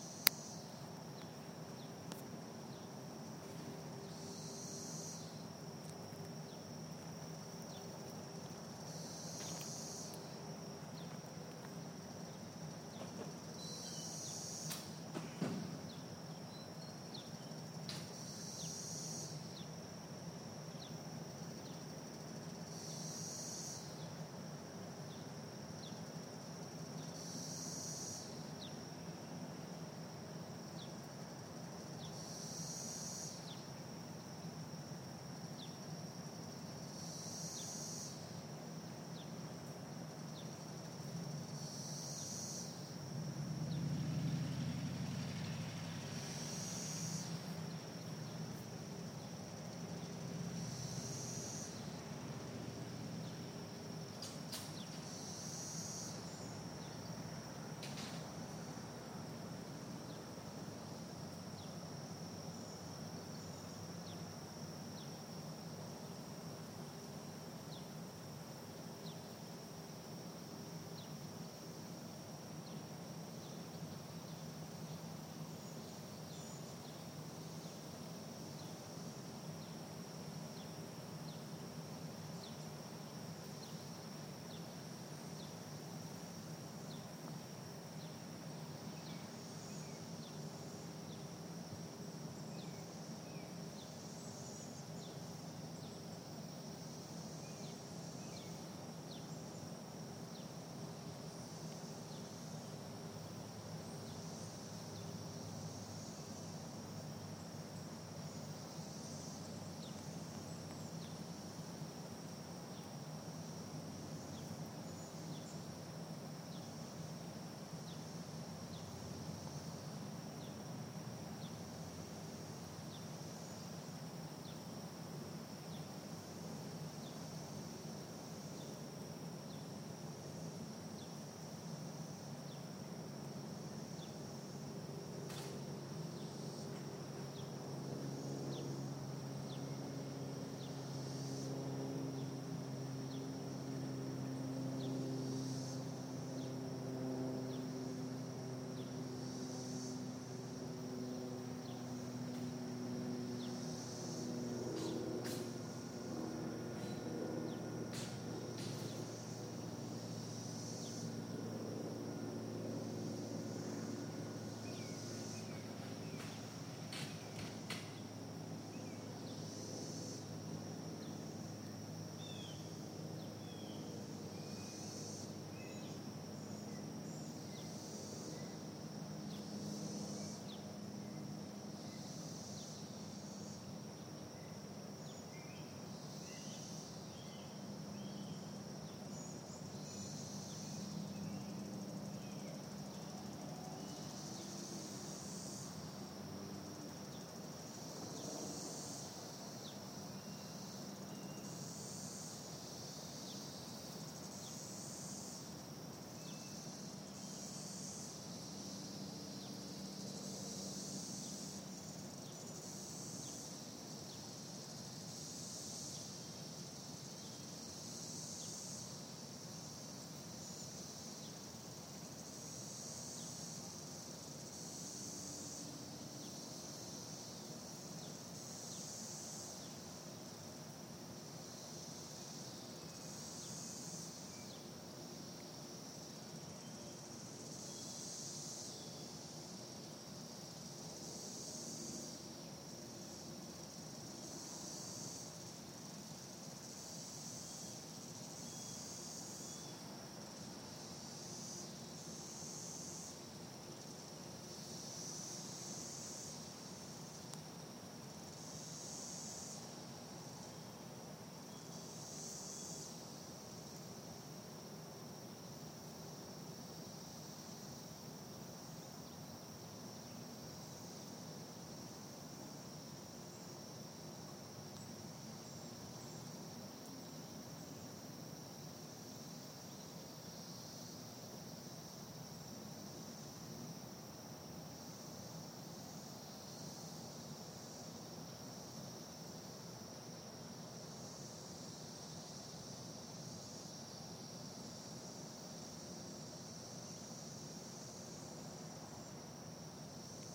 Cicadas behind Kroger in Logan, Ohio 2016-06-22 6:00pm
2016-06-22
Behind Kroger
Logan, Ohio
In late spring 2016, seventeen-year cicadas emerged in a section of the United States that includes eastern Ohio. The cicadas crawl out of the ground, shed their skins, and climb up into the trees. One cicada makes a loud and distinctive sound, but a whole group of them creates a dull roar.
This recording was made behind the Kroger supermarket in Logan, Ohio, toward the end of the cicada emergence. The roar of cicadas is gone, and only a few M. cassini individuals can be heard now.
Sound recorded using the built-in mic on an iPhone 5.
Logan-Ohio, Hocking-County, Brood-V, 17-year, Hocking-Hills, magicicada, cicadas, cicada, Ohio, song